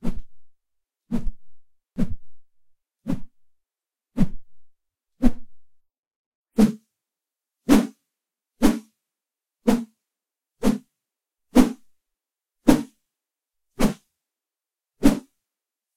Multiple takes on cartoon swishes for transitions, first half of the file with lower intensity. Created by flinging a long wooden lathe next to the microphone which caused pronounced lower frequencies so you might want to run a hi-pass from ~150 Hz.
cartoon, swish